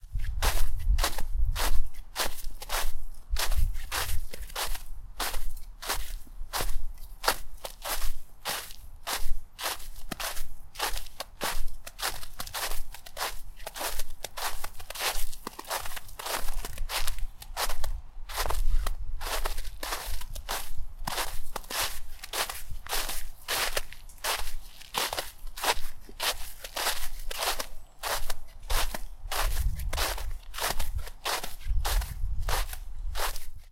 Footsteps, Snow, A
Raw audio of footsteps in a thin layer of snow-topped grass.
An example of how you might credit is by putting this in the description/credits:
The sound was recorded using a "H1 Zoom recorder" on 13th January 2017.